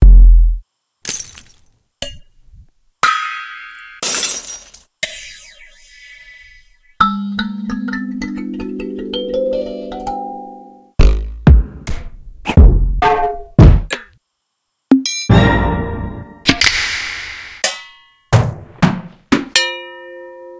Transient sounds formatted for use in Make Noise Morphagene. Recorded with Zoom H4N in a kichen, then processed in Ableton Live. There are a few drum transients from a recording session as well.